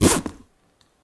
0015 MZipper Processed
Recordings of the Alexander Wang luxury handbag called the Rocco. ZIpper processed
Alexander-Wang, Handbag, Hardware, Leather